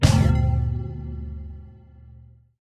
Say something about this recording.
I made this sound for when a character in a video game takes damage. Enjoy!
damage, game-sound, hit, hp, video-game